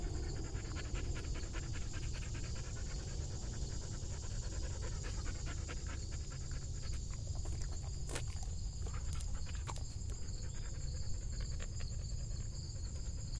Dog Panting in Woods
We stopped to talk to a dog-walker while on a walk though South Mountain Reservation, and I recorded the dog panting. There are crickets in the background.
Two Primo EM172 Capsules -> Zoom H1
animal, dog, field-recording, forest, lick, licking, outdoor, panting